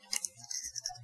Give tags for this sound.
ruffle
shuffle

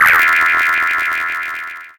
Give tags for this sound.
animation
box
cartoon
film
game
Jack-in-the-box
movie
spring
stretch
stretching
video

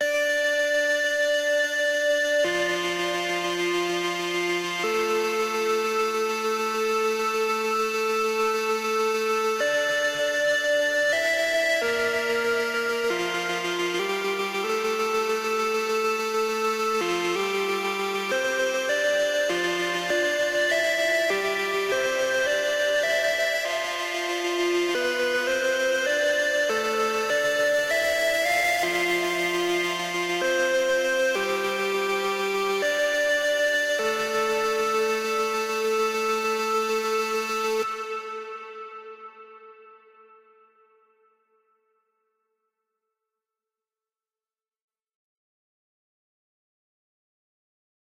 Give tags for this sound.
Electronic
Pipe
Synth

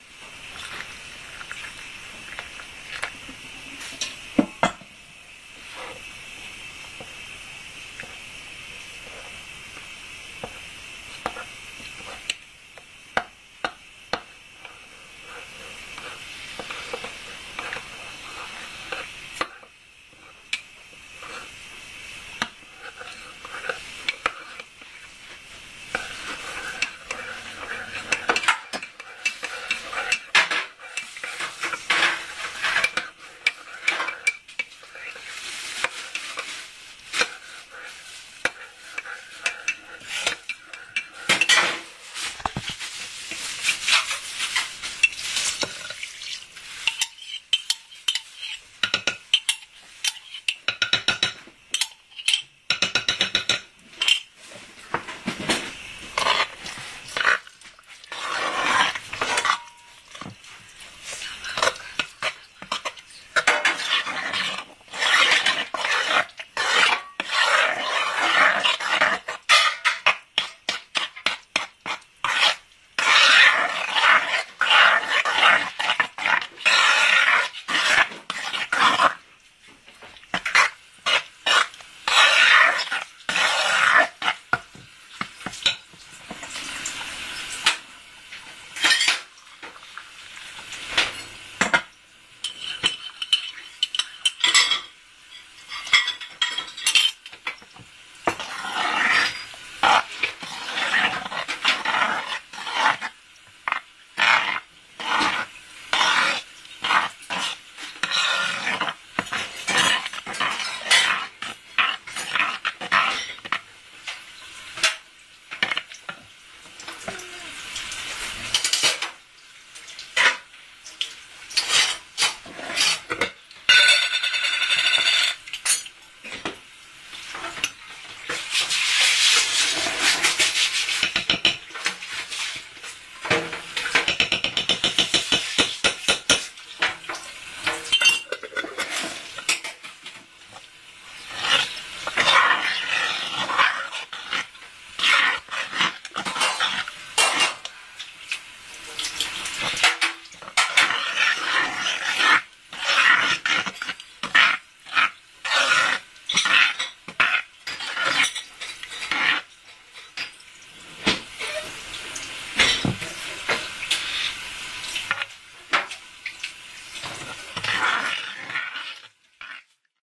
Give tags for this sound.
field-recording
peeling
domestic-sounds
kitchen
food
mixing
christmas

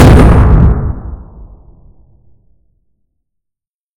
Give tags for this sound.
Bomb
War
Explosion
Explosions

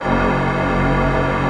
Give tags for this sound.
Combfilter
Multisample
Synth

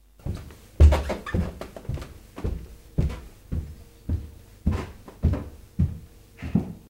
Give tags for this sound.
stairs
walking

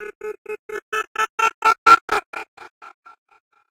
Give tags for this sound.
gritando
nortec
voz

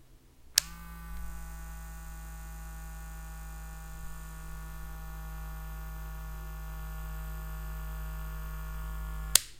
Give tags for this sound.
switch
click
motor
whir
electric

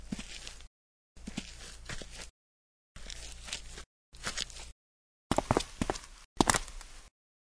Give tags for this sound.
boot
dead-season
dirt
floor
foley
step
walk

